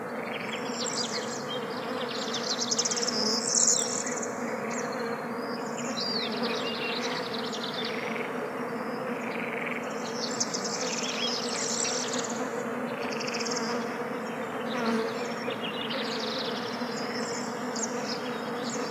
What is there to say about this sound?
20060326.marshes.beeswarm02
a large group of male solitary bees just emerged, frogs, beefly, and birds in background. Rode NT4> FelMicbooster>iRiverH120(rockbox) /un gran grupo de machos de abejas solitarias, ranas, bombilido y pajaros al fondo
bees, birds, field-recording, frogs, south-spain